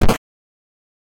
Equip - [Rpg] 1
arm, ct, efx, equip, free, fx, game, game-sfx, put-on, rpg, sfx, sound-design, sound-effect